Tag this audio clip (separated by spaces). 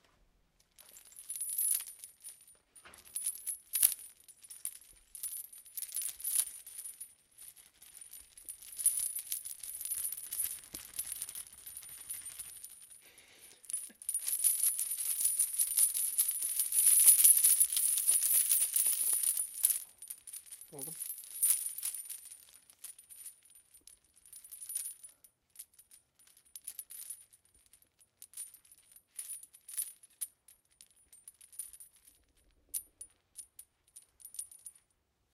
clanging rattle chains clinking rattling